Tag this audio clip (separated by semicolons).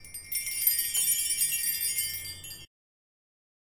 chimes; magical